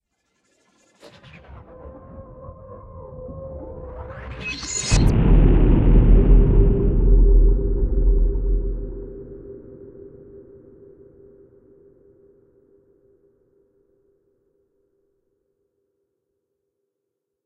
ship explosion 1 with build up
"sci fi implosion" by LloydEvans09
"etl duck explodes" by cmusounddesign
build, explosion, sci-fi, up